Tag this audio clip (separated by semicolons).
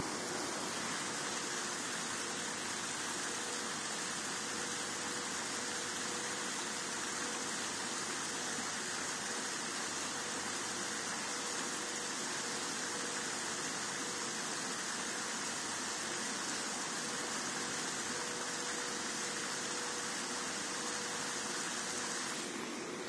water; field-recording; noise; industrial